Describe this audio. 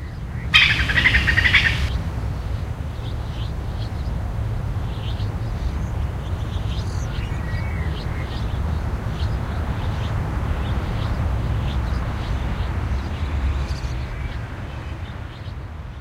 squirrel chatter w city
squirrel chatter in the city park. its a warning.
ambiance, chatter, city, park, squirrel, warning